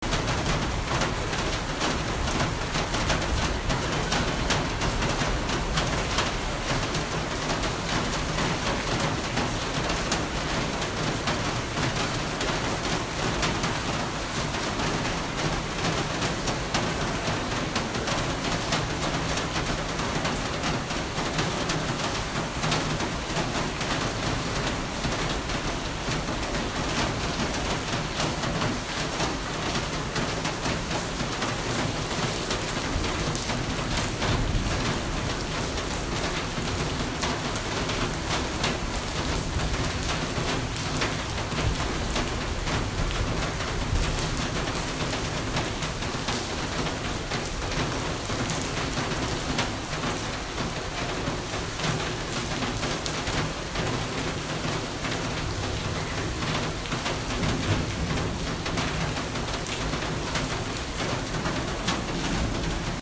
Rain in Kathmandu
Rain falling on a roof in Kathmandu hotel